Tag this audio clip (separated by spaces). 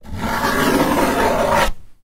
nail cardboard roar scrape dare-9 scratch box